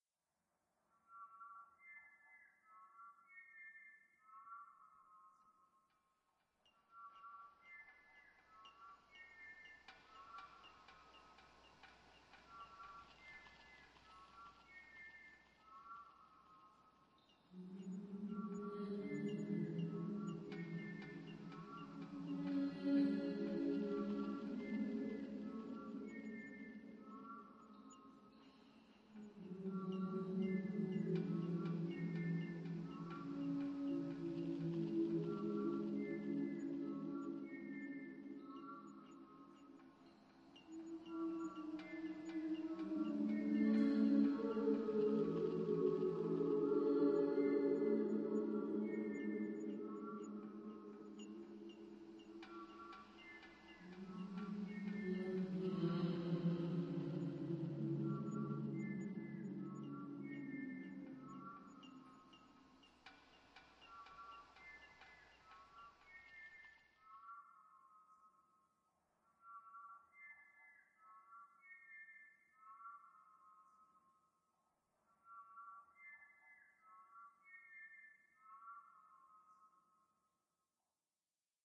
song in remembrance of sinking, maybe?
Voice, whistle, and the banging within a large petroleum tank recorded/altered/mixed thru audacity.
loop, ocean, soundtrack, sea, sailor, sad, lonely, mermaid, nautical